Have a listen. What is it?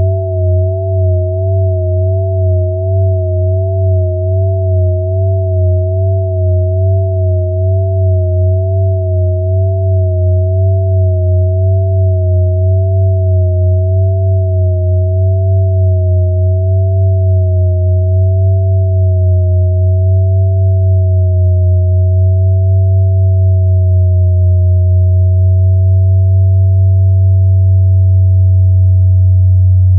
Long stereo sine wave intended as a bell pad created with Cool Edit. File name indicates pitch/octave.
pad, synth, bell, multisample